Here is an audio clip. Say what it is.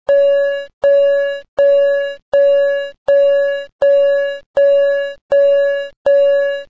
Electronic School Bell
A simple 9-tone bell to mark lunch time or end of period of end of school.
ring, ding, bell, chime, pa, microphone, alert, school, ping